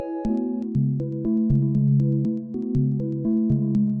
a5sus2 arpeggio stab glassy synth clicky low freq atmosphere-07
a5sus2 arpeggio stab glassy synth clicky low freq atmosphere
ambient, house, club, trance, clicky, glassy, dance, loop, bass, a5sus2, freq, stab, atmosphere, rave, electronic, synth, music